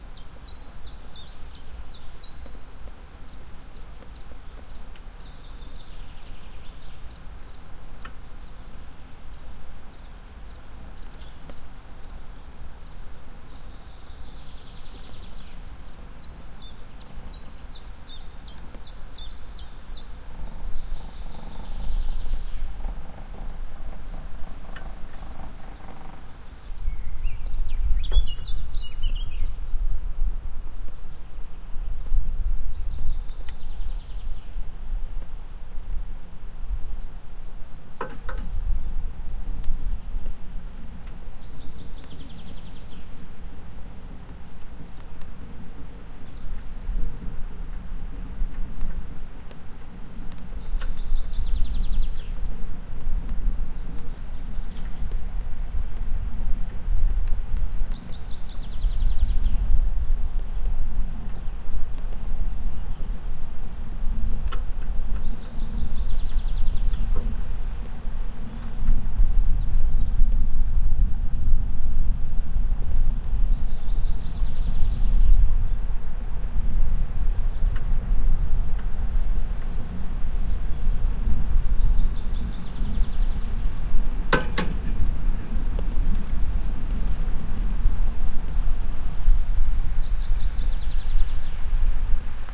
Recorded with Sony PCM-D50 in June 2014 on the cableway in the Carpathians, Ukraine.
birds, nature, ropeway, summer, PCM-D50, water, field-recording, sony